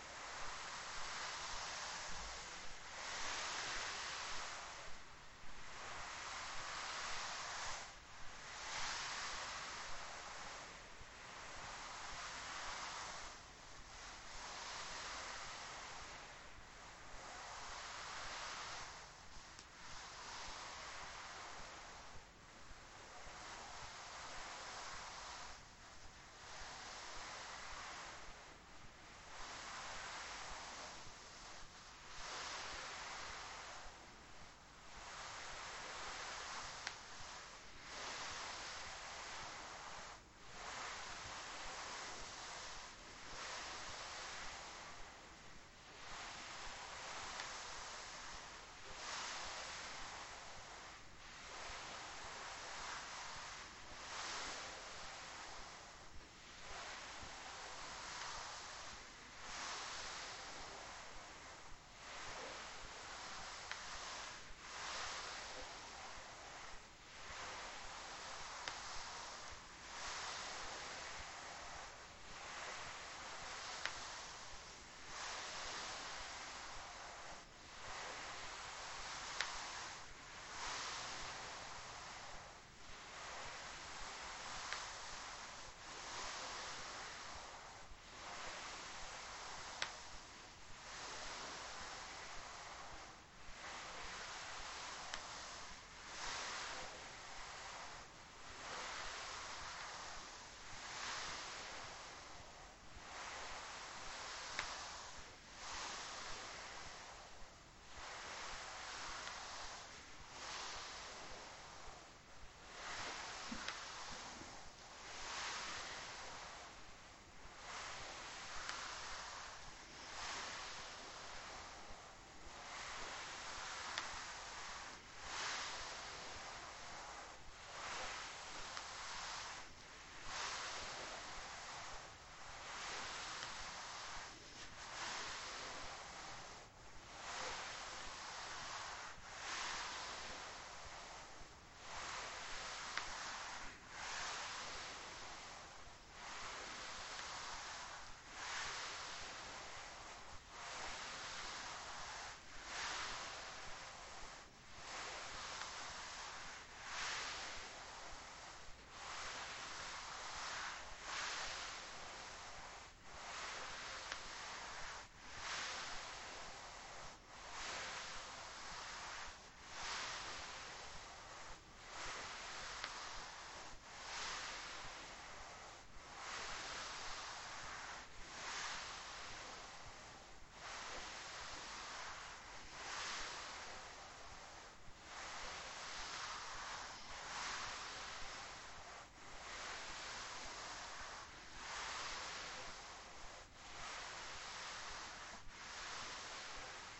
Person Sliding on Carpet
A person slides around a carpet on their belly, making slithery sliding noises. Recorded with an H1 Zoom (originally for the purposes of helping to build up foley of snake slithering).